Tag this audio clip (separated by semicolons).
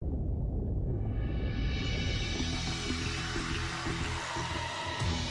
bass; kick; wavey